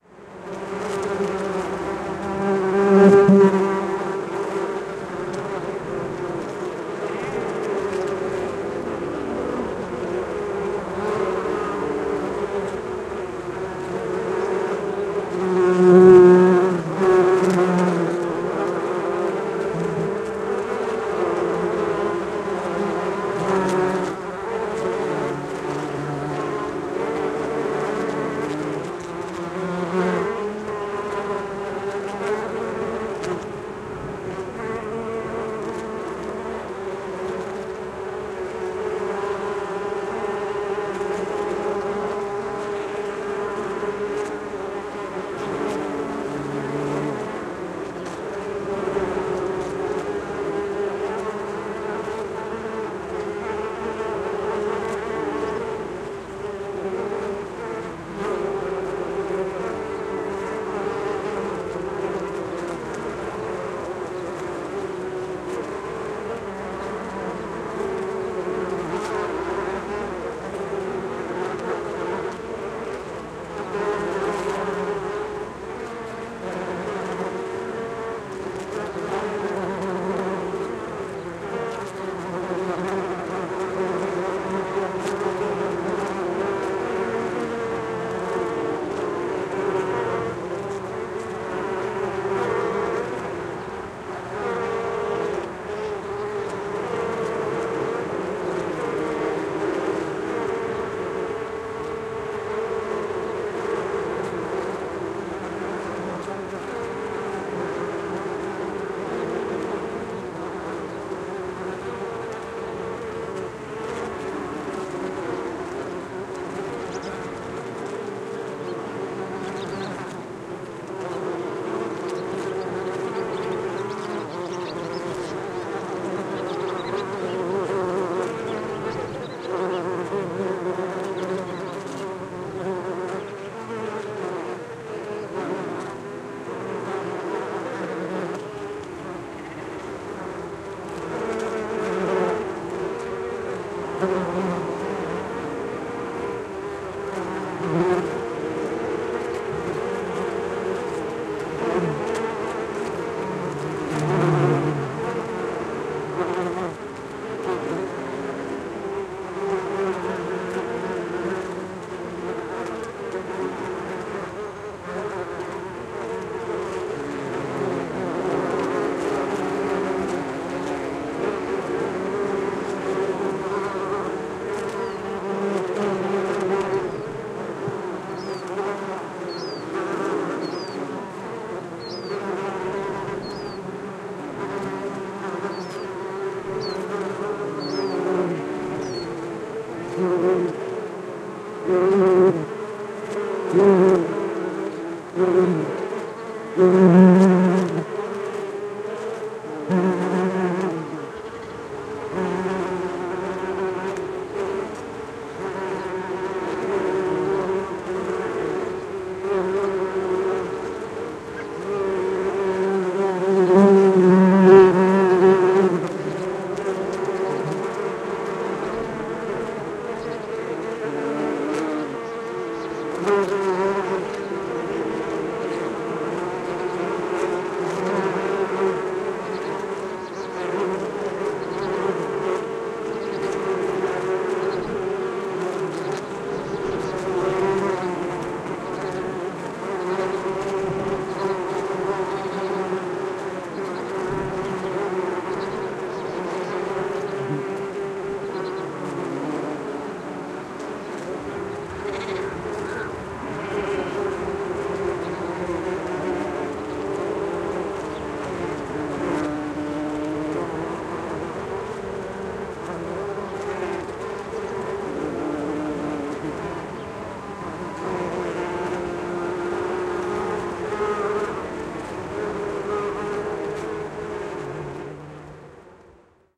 A stereo field-recording of many bees, of various species foraging on a Cotoneaster plant. Recorded on a sunny but windy day. Rode NT-4 > FEL battery pre-amp > Zoom H2 line-in.
field-recording, bees, stereo, xy